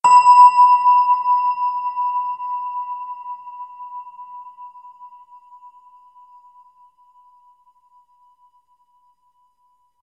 Synthetic Bell Sound. Note name and frequency in Hz are approx.
sound-design, digital, ring, bell, processed, synth